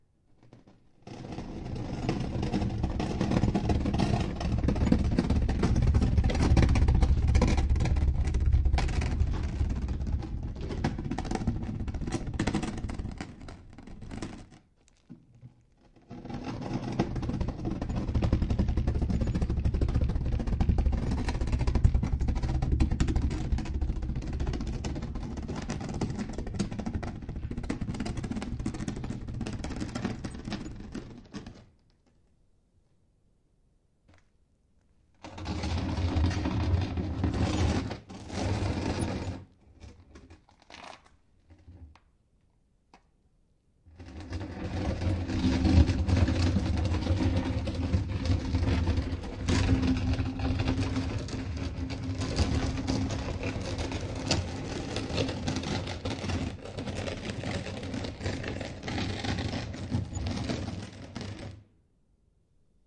Dragging different kinds of stones, concrete blocks, on a concrete ground, looking for the sound of an opening grave, for a creepy show. Recorded with a zoom H2.
ambience, creepy, soundeffect, stone